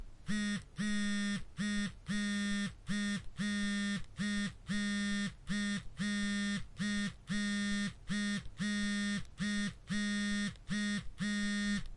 Cell phone vibration - alternating pulses
Cell phone vibrations, recorded with a Zoom H1.
Cell, mobile, phone, ring, ringing, telephone, vibrate, vibrating, vibration